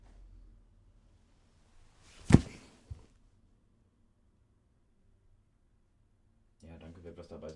body falling to ground
fall, falling, down, ground, body